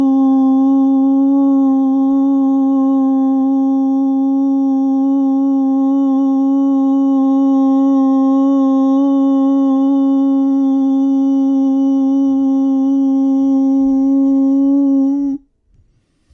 GdlV Voice 2: D♭4
Unprocessed male voice, recorded with a Yeti Blue
human, male